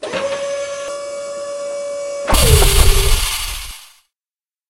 Heavy mechanical clamping mechanism.